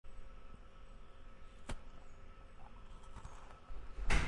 Cookie Crunch
Bite being taken of a biscuit.
biscuit, bite, chew, chomp, cookie, crackle, crunch, eat, monch, munch, OWI, teeth